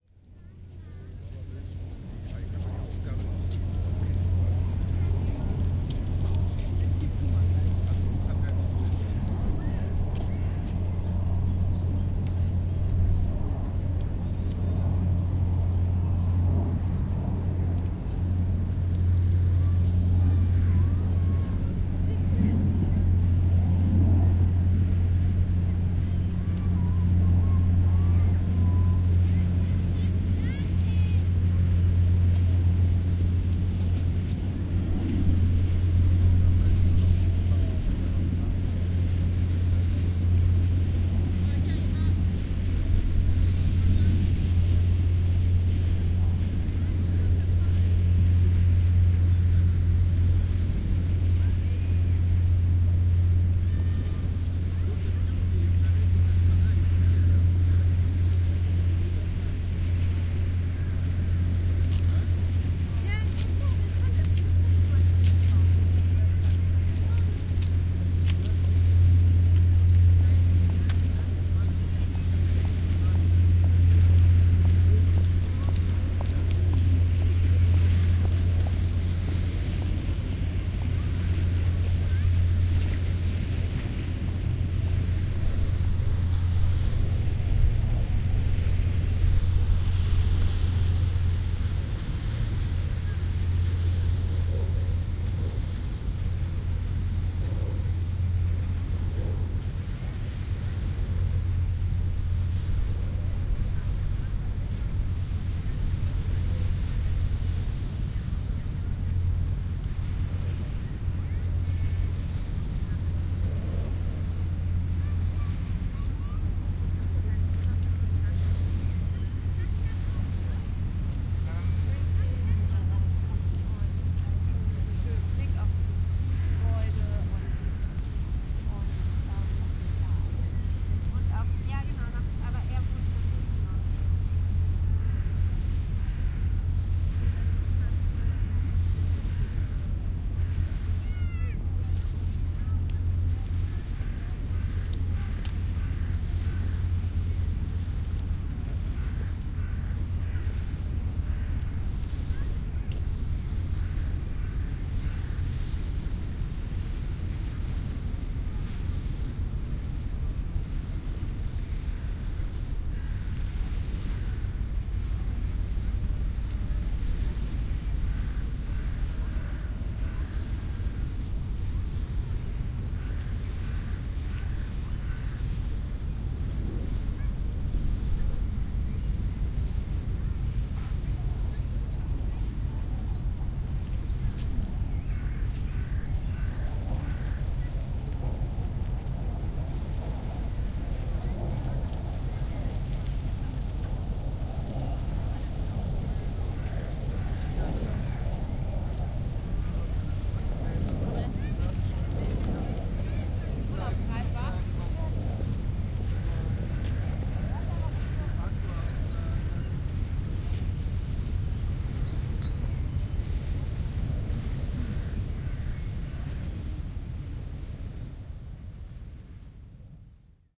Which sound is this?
brisk wayside at harbor in Hamburg at the Elbe.
Wegesrand in Hamburg, Övelgönne an der Elbe